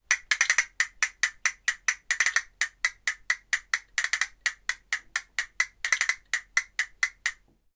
Castanets, Multi, A (H1)
Raw audio of a pair of plastic castanets being played rhythmically. Recorded simultaneously with the Zoom H1, Zoom H4n Pro and Zoom H6 (XY) recorders to compare the quality. Thee castanets were about 1 meter away from the recorders.
An example of how you might credit is by putting this in the description/credits:
The sound was recorded using a "H1 Zoom recorder" on 11th November 2017.
Castanet, Castanets, Flamenco, H1, Percussion, Plastic, Rhythm, Spanish